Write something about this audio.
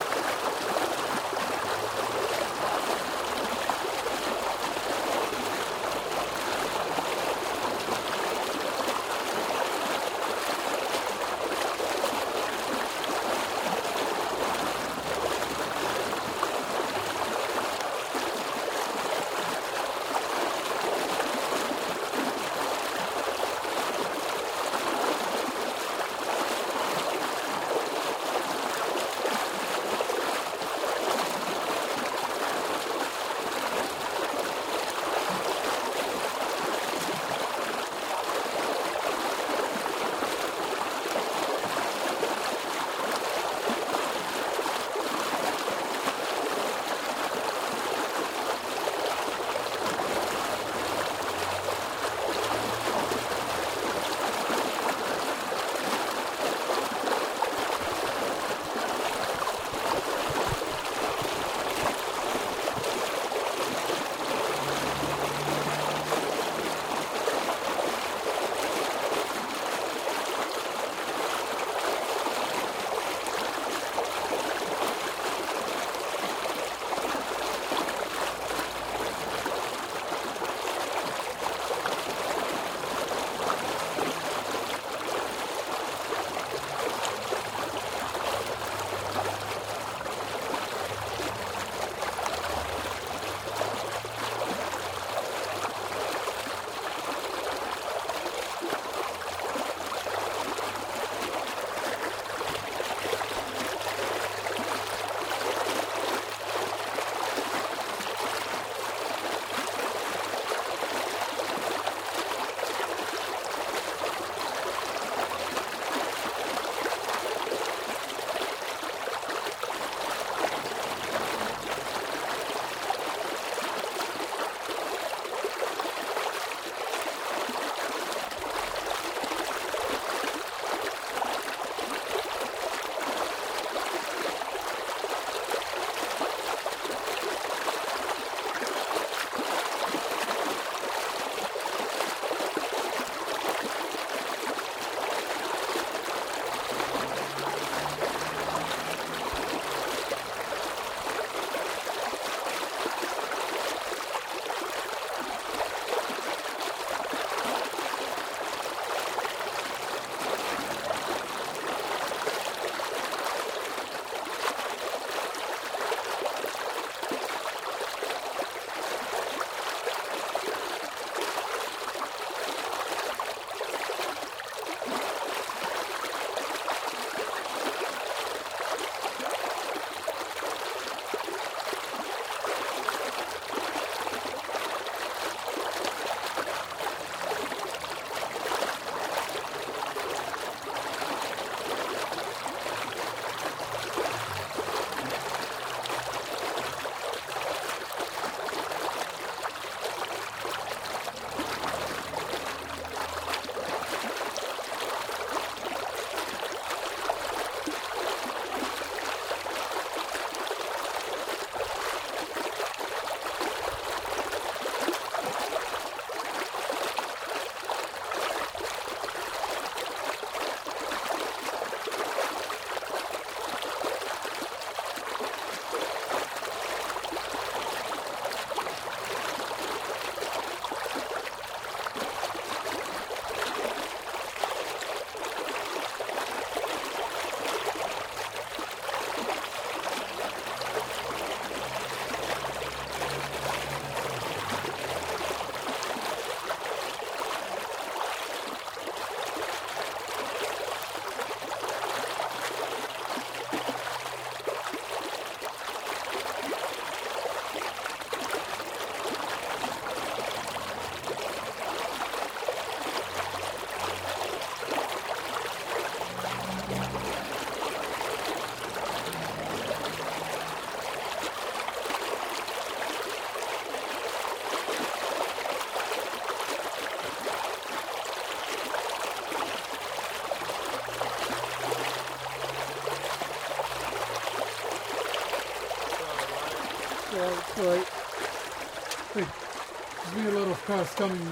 Water leak in London 2
A pipe busted in South East London letting plenty of water come off. This is the raw recording with no post processing done whatsoever. You will also hear the cars that drove nearby while I was recording.